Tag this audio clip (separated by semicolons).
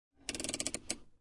Car; Click; Handbrake